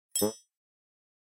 A cartoony blink sound effect made in Logic Pro X.
I'd love to see it!
bell-tree, blink, cartoon, cartoony, comic, comical, cute, eye, fun, funny, honk, musical, reaction, short, silly